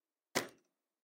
An unknown noise of distress caused from somewhere inside an airplane.
air, airplane, bang